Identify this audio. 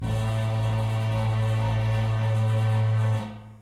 hi1 - hi1
Electric shaver, metal bar, bass string and metal tank.
Repeating, electric, engine, metal, metallic, motor, processing, shaver, tank